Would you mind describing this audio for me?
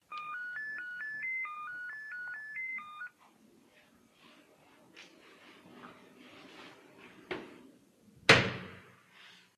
Домофон и дверь record20151219023537
Door phone ring, closing of steel door. Домофон и закрывающаяся дверь. Recorded with Jiayu G4 for my film school projects. Location - Russia.
closing, door, doorphone, ring, slamming